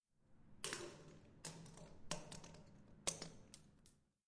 Pinecone down Geology Stairs
This recording is of a pinecone being dropped down the spiral staircase of the geology library at Stanford University